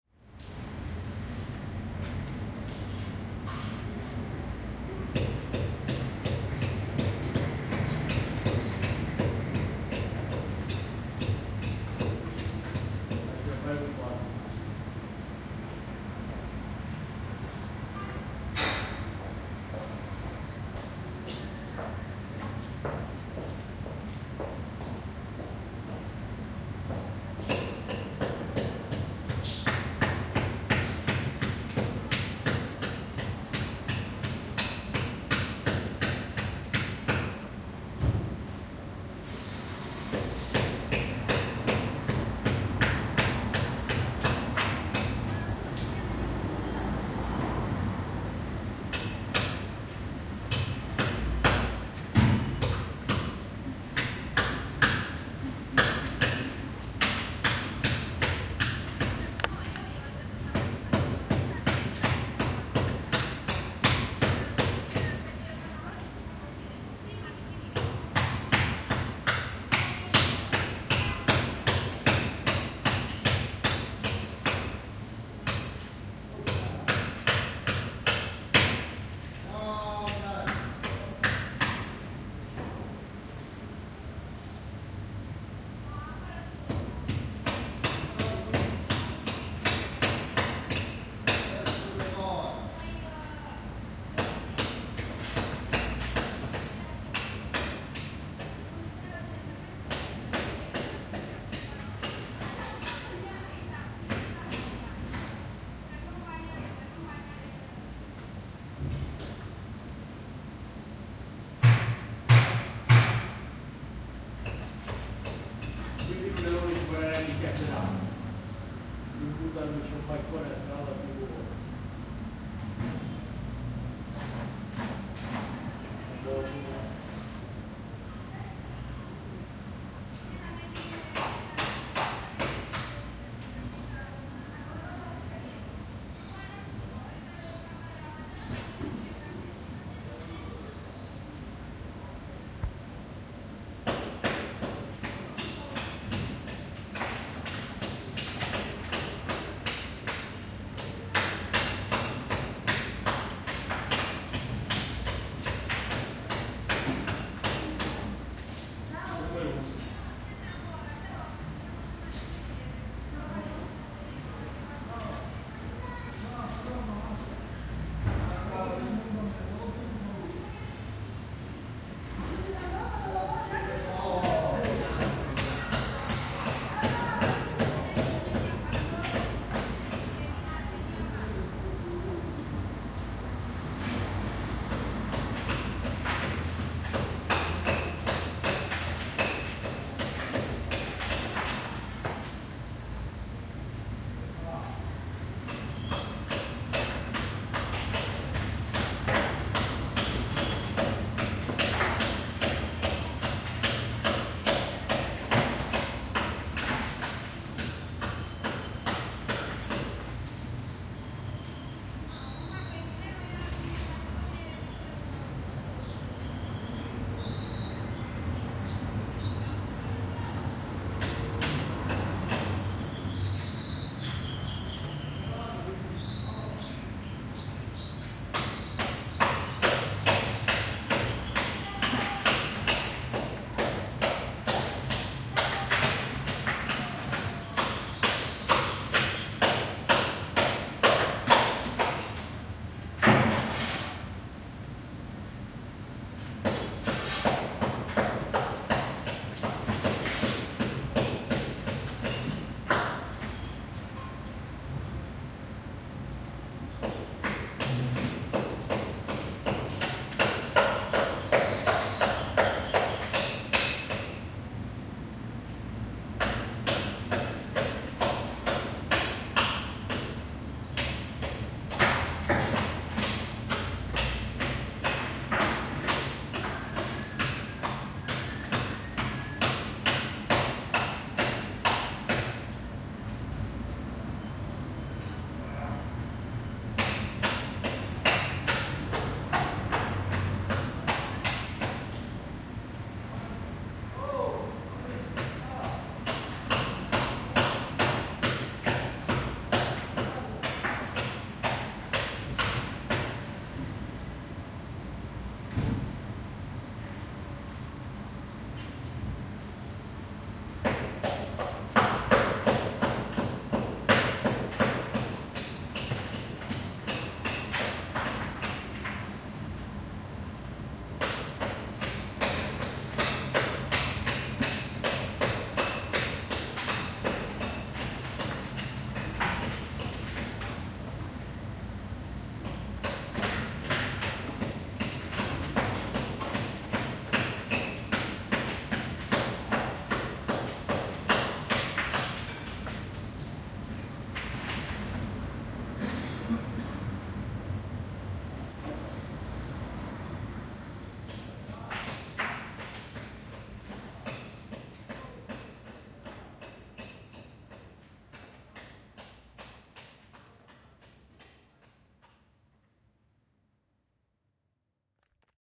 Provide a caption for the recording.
[001] Via Giovanni Bovio (morning)
date: 2011, 30th Dec.
time: 09:30 AM
place: Castellammare del Golfo (Trapani)
description: A bricklayer works and speaks with an African. In the distance, birds and cars. Recording from inside (first floor).
bricklayer, Castellammare-del-golfo, morning, people-talking, rural, Trapani